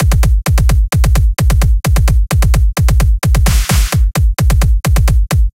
Wii resort - Swordplay [Showdown] Theme
Cool Wii Sports Resort Swordplay Showdown/sound-alike theme that's pretty close to the real theme, but created in FL studio 12 and not recorded from the Wii.
Resort, Showdown, Showdown-Theme, Sports, Swordplay, Theme, Wii